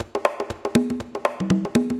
conga loop 120 bpm
conga, loop